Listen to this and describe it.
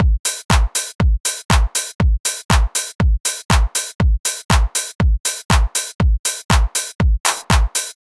Dance Beat
I hope this is usable.
Made in FL Studio 12.
Tempo: 120bpm.
electro, loop, drums, beat